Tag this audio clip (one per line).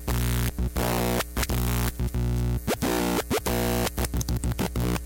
glitch
hum
bent
lofi
melody
power
electronic
electricity
circuitbending
noise